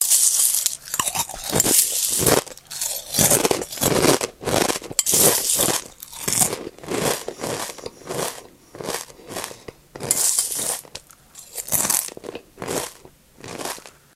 A sound effect of eating cereal